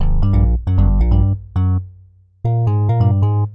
hard club bass